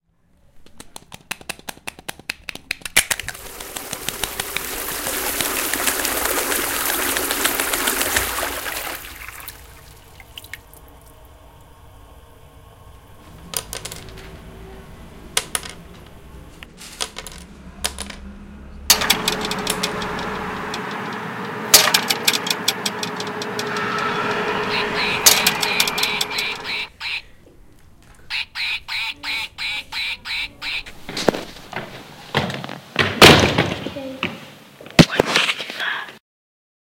Belgium students from Sint-Laurens school in Sint-Kruis-Winkel used mySounds from Swiss and Greek students at the Gems World Academy-Etoy Switzerland and the 49th primary school of Athens to create this composition.